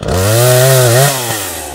Chainsaw Cut Quick
Field recording on an 18" 2-stroke gas chainsaw.
cut, gas, motor, chainsaw